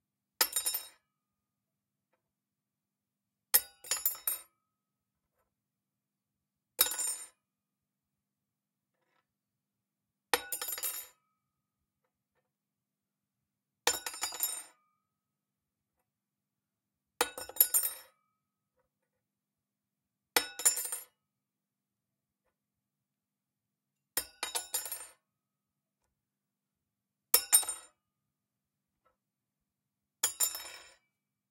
Cutlery Fork Knife Spoon Metal Dropped On Floor Pack
Cut, Ding, Scrape, Fantasy, Drop, Blacksmith, Armour, Rip, Metal, Draw, Swords, Sharpen, Knight, Blade, Medieval, Twang, Sharpening, Weapon, Slash, Floor, Chopping, Stab, Hit, Knife, Kitchen, Cutlery, Fight, Sword, Knives, Swordsman